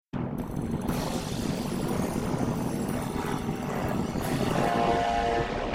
Residual for orchestral intro sound
Difference between original signal and reconstructed one
orchestral, residual, sine-model